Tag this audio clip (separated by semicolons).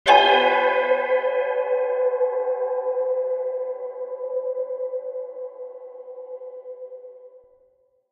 fear; gamesound; hit; horror; metallic; percussion; suspense